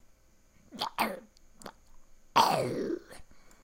Part of the sounds being used in The Lingering video game coming soon to PC. Created using Audacity and raw voice recording.
Creature, Moaning, PostApocalypse, Roar, Scary, Scream, Survival, VideoGame